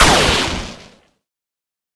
A synthesized laser shot sound to be used in sci-fi games. Useful for all kind of futuristic high tech weapons.
futuristic, game, gamedev, gamedeveloping, games, gaming, high-tech, indiedev, indiegamedev, laser, lazer, photon-cannon, science-fiction, sci-fi, sfx, spacegun, video-game, videogames